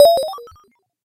Short modulated oscillations, variation. A computer processing unknown operations.Created with a simple Nord Modular patch.
digital, fm, sound-design, synthesis